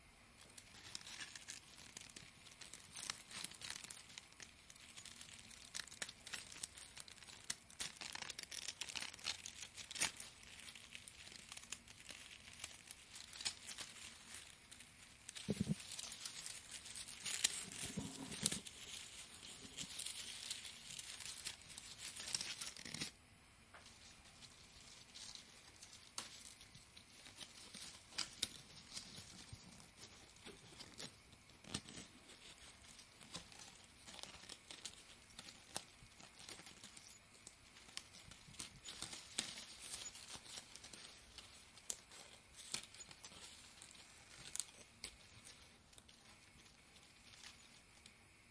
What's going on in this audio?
Crinkling dried flowers
Touching dried flowers and crinkling them between fingers. A rustling sound- resembles a small crackling fire.
dried-flowers, rustle, rustling, crinkling, crackling, leaves